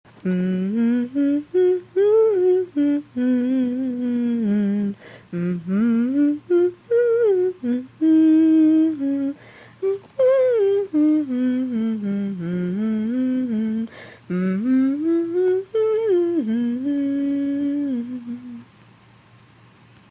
A woman humming a nondescript folksy tune. Alto voice/pitch.
Recorded on an ipod touch. The voice is my own.